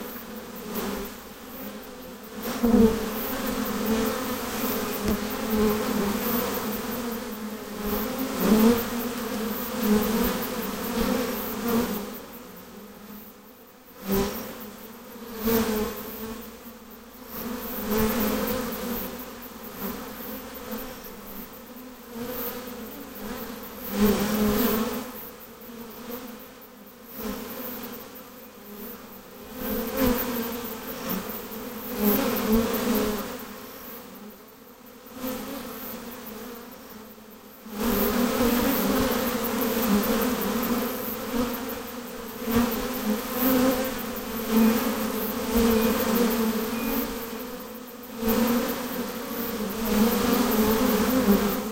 A recording of Honey Bees returning to their hive in summer 2016. Recorded in the hills of the Waitakere Ranges in Auckland, New Zealand.